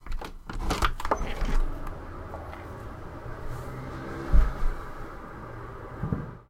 opening window
me opening a window to the noise of a city.